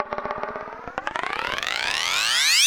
little click feedback
Percussice clip processed with delay modulation feedback